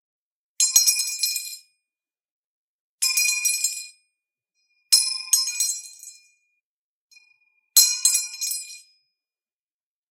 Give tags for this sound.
30; 30caliber; caliber; clip; drop; firearm; garand; grand; gun; m1; m1garand; magazine; ping; rifle

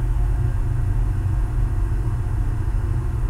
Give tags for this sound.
sound; sound-effect; video-games

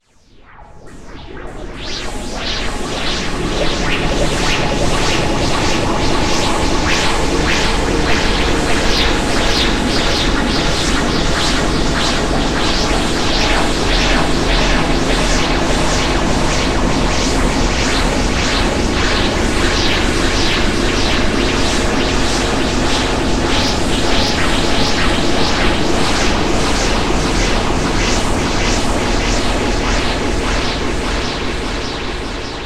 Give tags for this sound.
alien; noise; horror; space

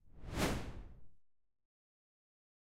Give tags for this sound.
fly-by; whoosh; pass-by; gust; swish; fast; wind

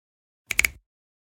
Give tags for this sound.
crunch; brittle; fingers; finger; snapping; pop; snap; bone; crack; natural; snaps; percussion; click; hand; tap; hands; fingersnap

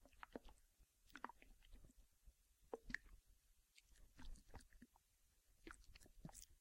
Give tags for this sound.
human; empty; hunger; hungry; stomach

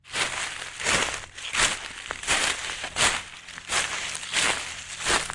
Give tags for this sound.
leaf; walk; leaves

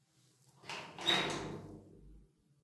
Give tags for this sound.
door; metal-door; unlock-door; unlocking-door

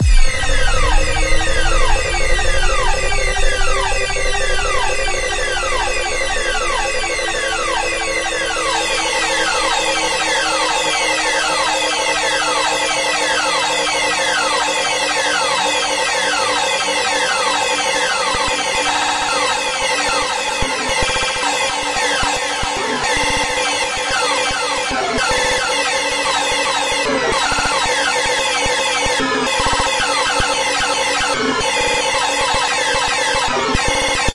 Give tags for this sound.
background-sound; terror; strange; horror; thrill; haunted; Gothic; ghost; nightmare; scary; sinister; cre; weird; spooky; macabre; bogey; creepy; suspense; terrifying; dramatic; Ambiente; drama; phantom; anxious; deep

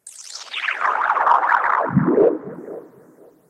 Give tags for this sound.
broadcasting; Fx; Sound

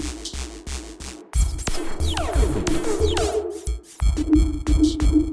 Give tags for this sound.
deathcore; e; fuzzy; glitchbreak; h; k; l; love; o; pink; processed; small; t; thumb; y

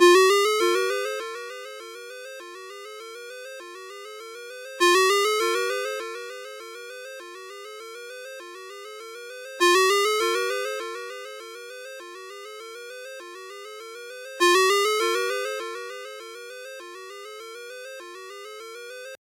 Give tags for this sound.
alarm; alert; alerts; cell; cell-phone; cellphone; mojo; mojomills; phone; ring; ring-tone; ringtone; up8

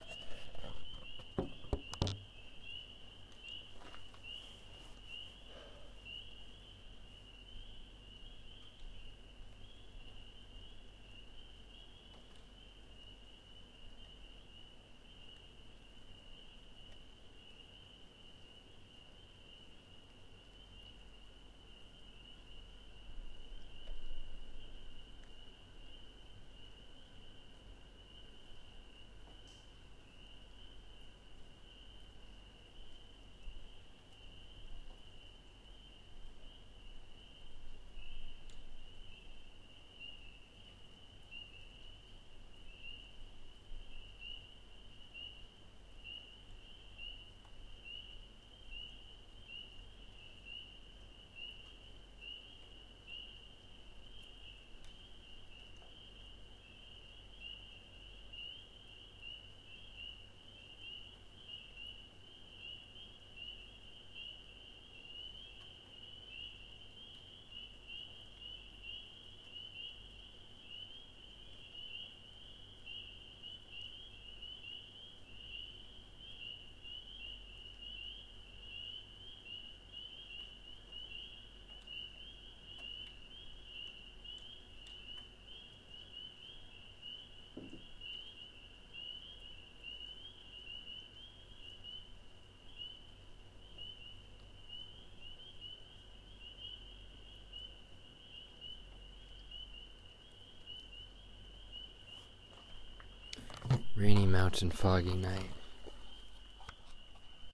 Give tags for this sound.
chirps; field-recording

